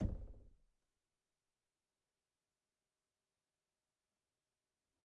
Door Knock - 38
Knocking, tapping, and hitting closed wooden door. Recorded on Zoom ZH1, denoised with iZotope RX.
door, percussive, bang, percussion, tap, wooden, closed, wood, knock, hit